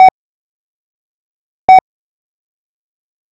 Battlezone Radar Noise
A remake of the radar noise from the old arcade game 'Battlezone'
battle, battlezone, beep, boop, ping, radar, red-tape, tank, zone